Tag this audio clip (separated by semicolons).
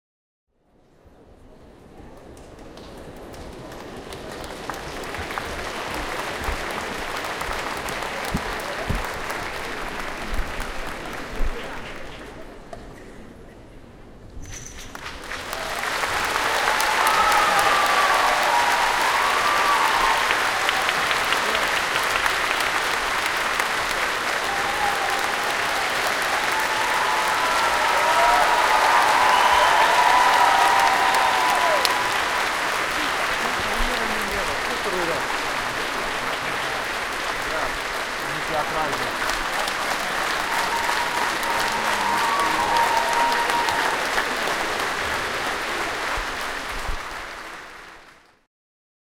applause; audience; cheer; cheers; clap; crowd; foley; loud; people; performance; polite; show; theater